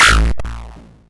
Sound effect created with BFXR. Suggested use - Energy shield.
sound-effect, digital, game, video-game, BFXR